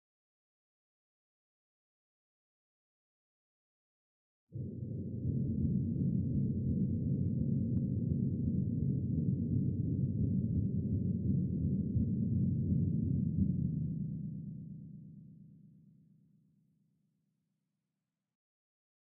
Low Mechanical Ambience
This is a sample I made from a piano through a variety of processors. It sounds to me like a texture you may hear in a boiler room or some kind of ventilation system.
ambience, delay, effect, low, pitch, processed